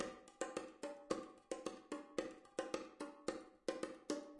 bongos 109bpm
bongos recorded with a sure 57, a touch of reverb, played at 109 bpm
pattern, loop, bongos, 109bpm